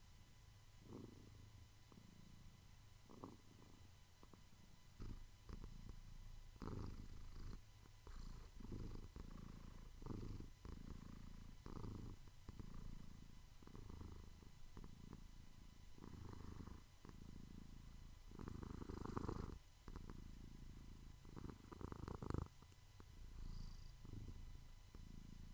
purring
Sound Quality: 2 Volume: 2
Recorded at 21/03/2020 16:10:33